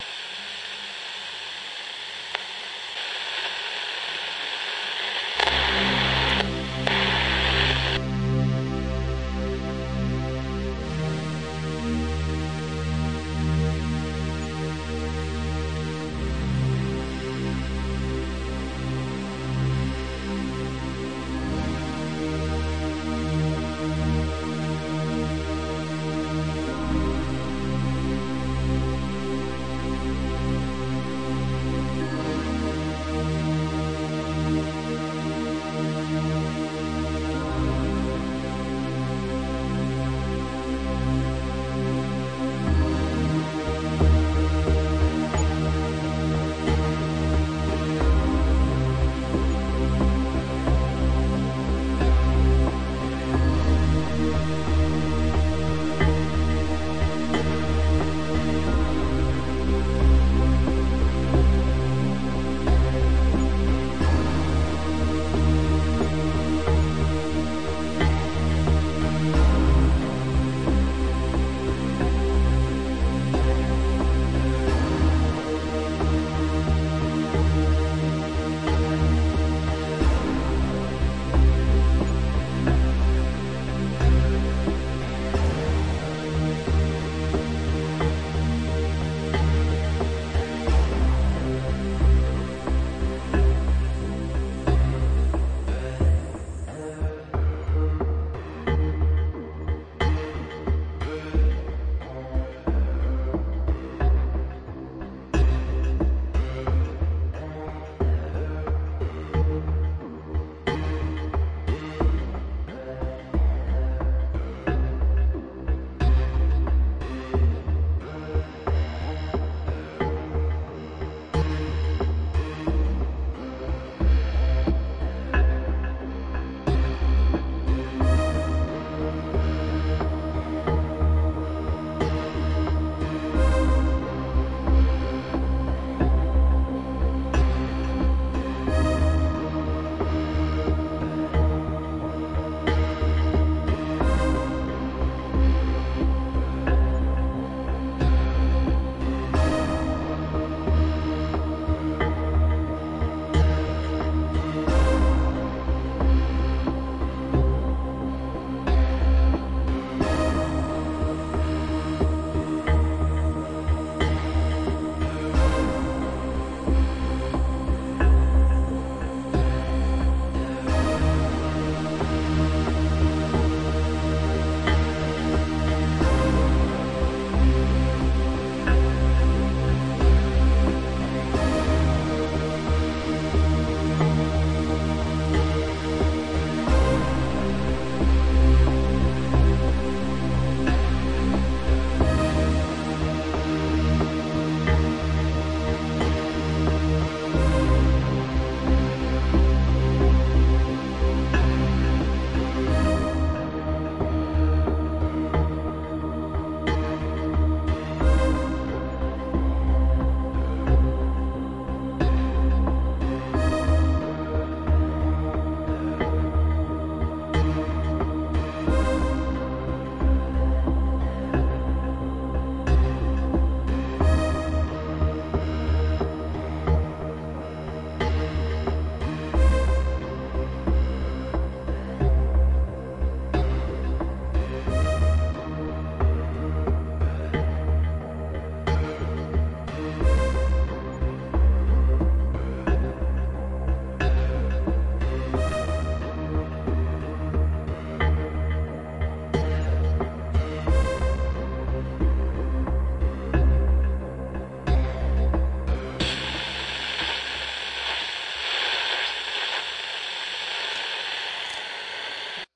sound to run
future, radio, sounds, space, star, SUN, wave